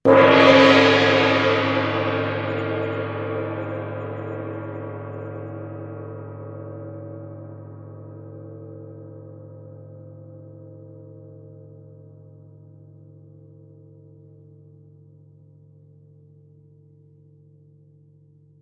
Loud 2 plashy
A loud 'plashy'-souding gong-strike sample
ambient, Gong-strike, loud, plashy-sounding, processed, samples